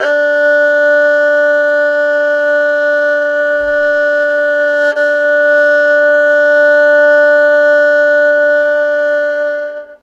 The erhu is an chinese string instrument with two strings,which is played
a lot in China. The samples are recordings from a free VST-instrument.